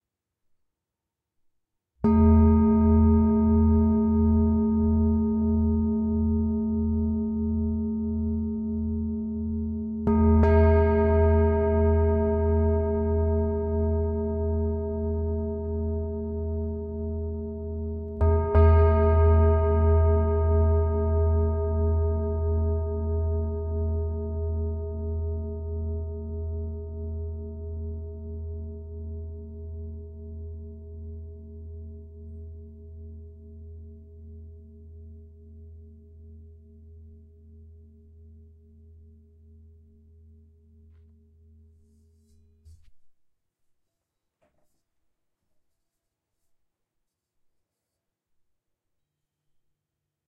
Sound sample of antique singing bowl from Nepal in my collection, played and recorded by myself. Processing done in Audacity; mic is Zoom H4N.
bell, bowl, bronze, chime, hit, metal, metallic, tibetan-bowl, ting